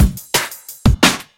Combo Break 2
Combination break made in FL Studio 20.
beat, beats, breakbeat, combo, dnb